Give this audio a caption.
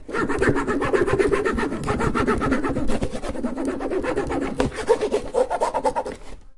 mySound MES Fahad
Barcelona, Mediterrnia, mySound, Spain